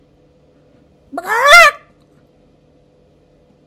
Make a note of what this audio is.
Imitation of chicken squawk, cluck, scream sound made by my face.